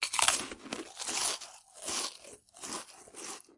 Eating a cracker (Mouth open)
Eating a cracker with my mouth open. I have another sound where I eat a cracker with my mouth closed.
Recorded with a Tascam DR-05 Linear PCM recorder.
effect, crispy, snack, nomnom, chew, munch, crunch, human, cracker, biting, chewing, sound, open, bite, eat, eating